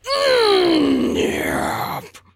WARNING: might be loud
got startled in a game, and it turned into some kind of frustrated growl, and then said yep.